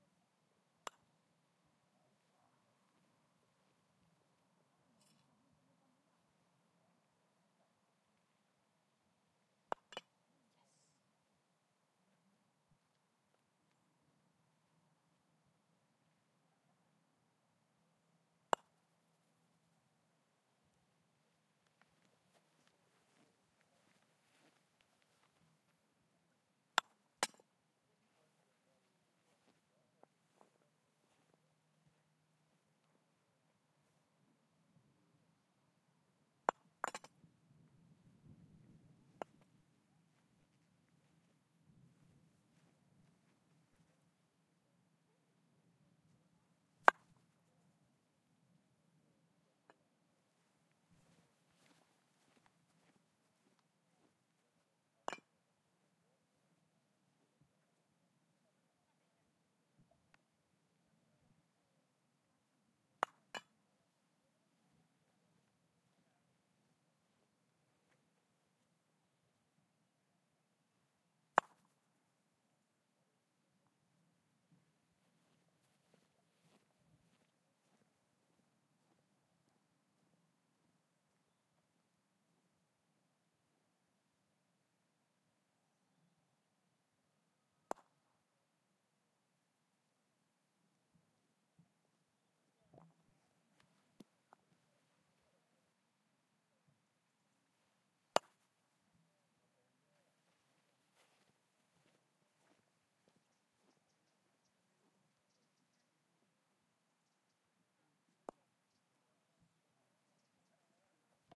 160903 Dunrobin Croquet
Several strokes of wooden club against a croquet ball. Sometimes the cling against the metal target is heard. Taken on the lawns of Dunrobin Castle in Golspie, Scotland.